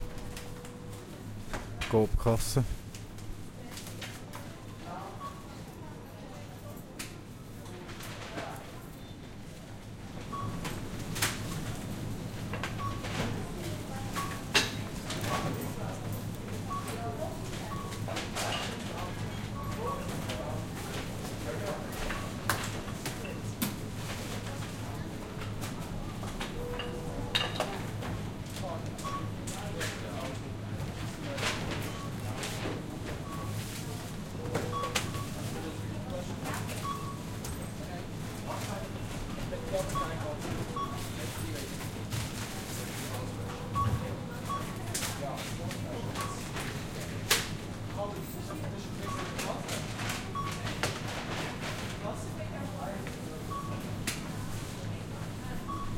Supermarket Ambience
Ambience of a Supermarket in Switzerland.
ambience, cash, cashier, cash-register, change, checkout, field-recording, grocery, market, money, people, shop, shopping, store, supermarket, wallmart